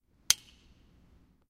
A bike's broken bell knob flicking, but not contacting the bell
aip09, bell, bicycle, broken, click, flick